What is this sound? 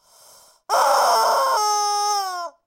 rubber chicken02
A toy rubber chicken
cartoony, honking